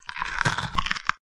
note: these samples maybe useful for horror media.
smiles to weebrian for the inspiration, the salads on me (literally)
(if this sound isn't what you're after, try another from the series)
effects, horror-effects, neck, horror, break, squelch, fx, arm, horror-fx, bones, torso, limbs, flesh, leg